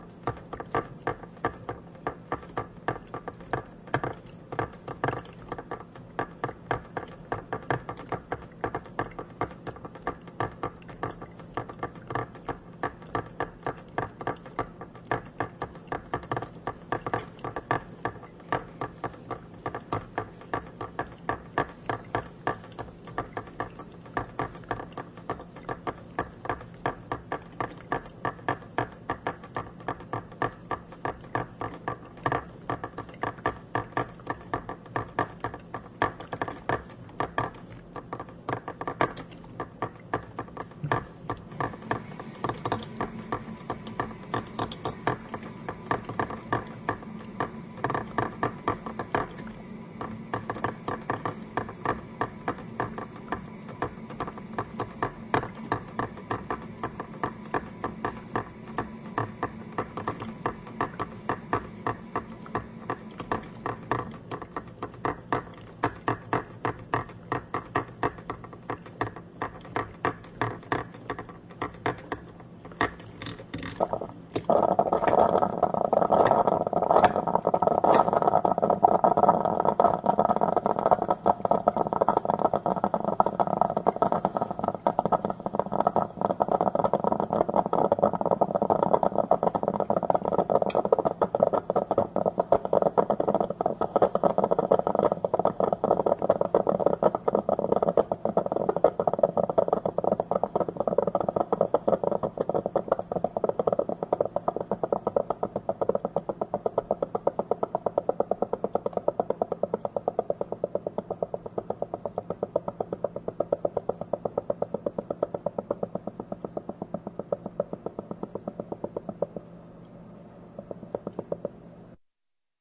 Ben Shewmaker - Coffee Brewing
Coffee brewing in my old coffee pot.
household, sound-effects